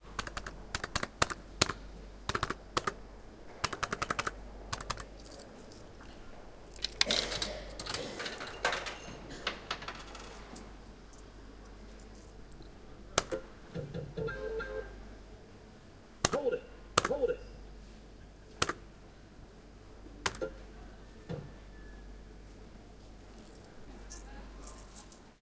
Clean and direct sounds of Slots` buttons and some game sfx. Just a little background noise.
This recording was made in Manchester Airport, UK as a part of my project for Location Sound module in Leeds Beckett University.
arcade, clean, gamble, game, games, penny